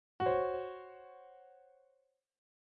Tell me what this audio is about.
Dissonant Piano Sting 3
A soft sting made in Logic Pro X.
I'd love to see it!
inquisitive, stinger, dramatic, short, hit, horror, drama, reveal, movie, eerie, quiet, chord, soft, spooky, piano, sting, cinematic, realization, musical, dissonant, suspense, dark